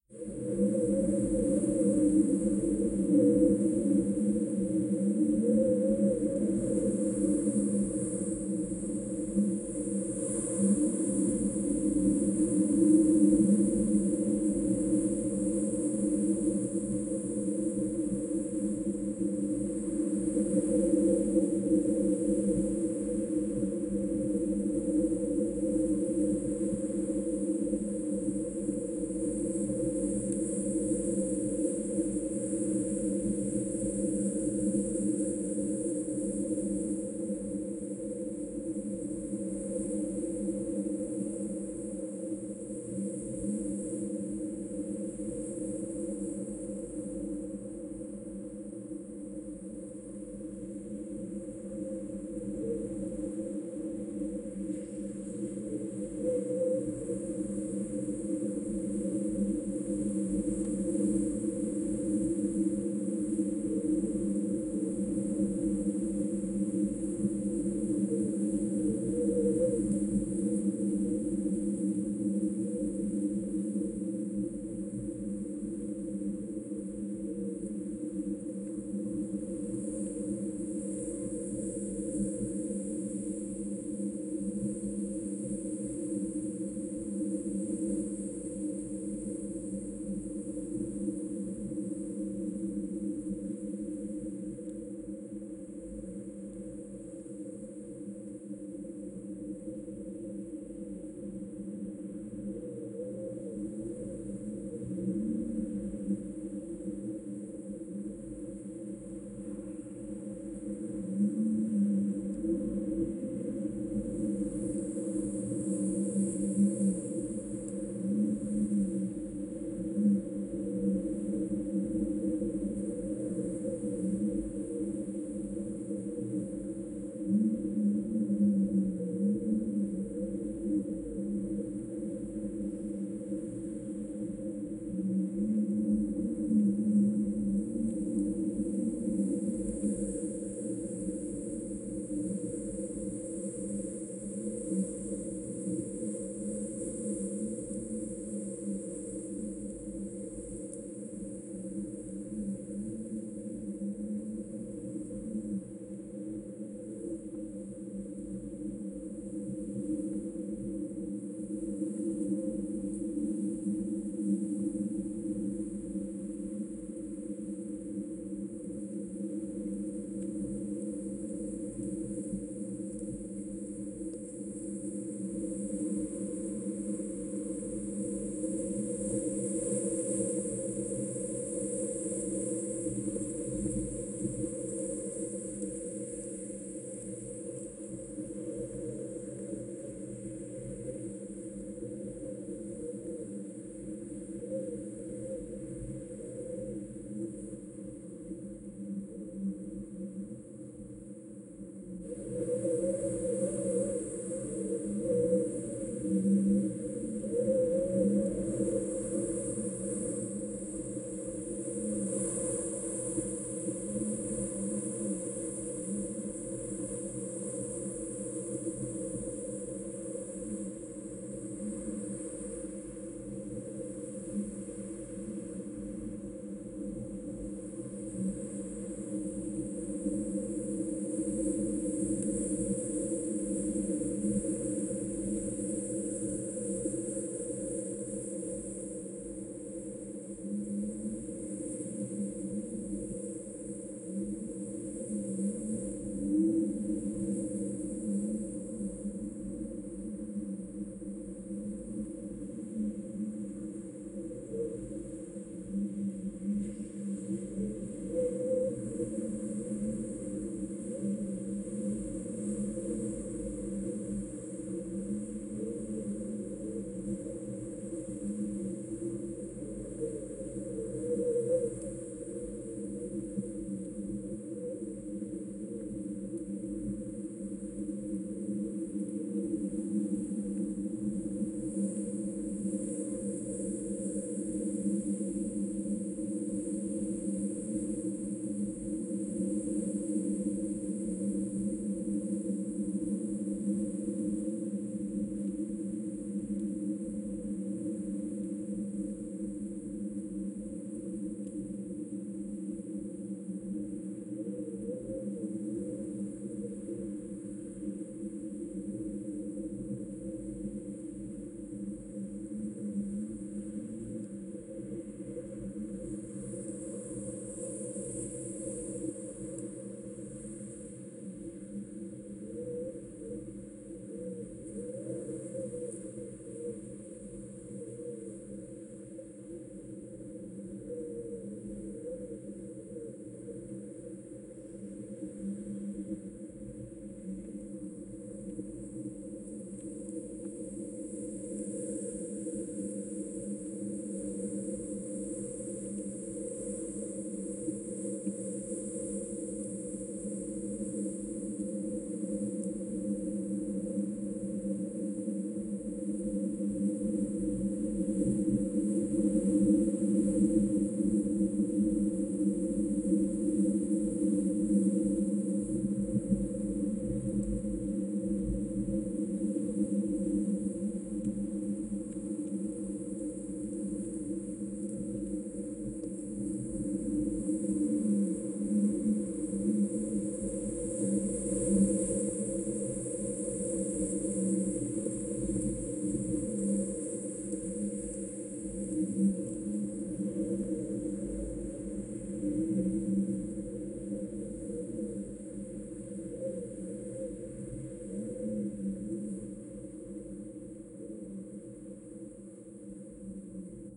Winter Wind Mash-Up slow
gust, nature, weather, windy